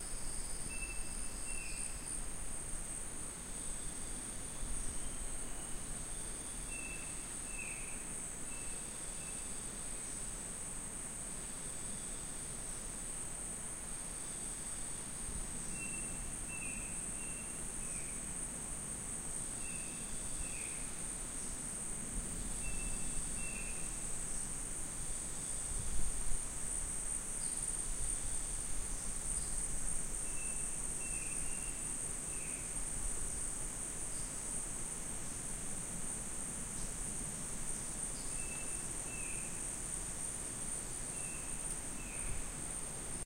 Jungle Choco 02
Recorded at Choco, Colombia
jungle, tropical, exotic, rainforest